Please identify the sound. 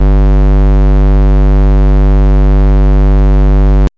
LR35902 Square G2
A sound which reminded me a lot of the GameBoy. I've named it after the GB's CPU - the Sharp LR35902 - which also handled the GB's audio. This is the note G of octave 2. (Created with AudioSauna.)
chiptune, fuzzy, square, synth